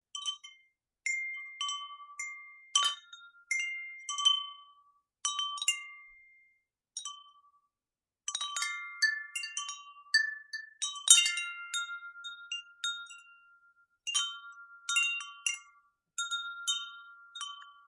Wanted to make a beautiful newage synth pad with spacious windchimes.
Recorded with a Sony IC recorder, cleaned up using Edison in Fl Studio.
newage, relaxing, sony-ic-recorder, windchime